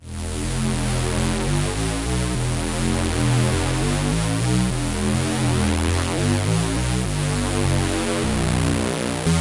biggish saw synth e e g b 102 bpm